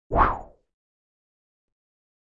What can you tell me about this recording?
Woosh Medium Short 01
White noise soundeffect from my Wooshes Pack. Useful for motion graphic animations.
space, scifi